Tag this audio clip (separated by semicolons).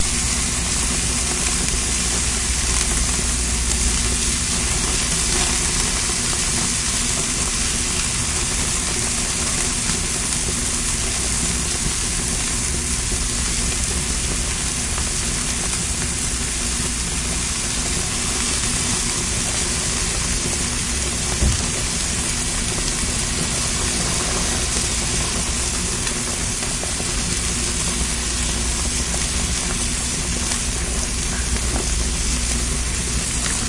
food; field-recording; unprocessed; test; microphone; cook; steak; grill; digital